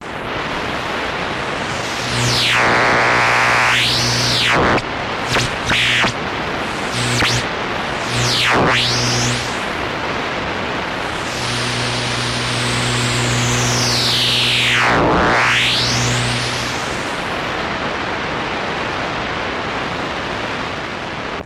Radio Noise 3
Some various interference and things I received with a shortwave radio.
Interference, Noise, Radio, Radio-Static, Static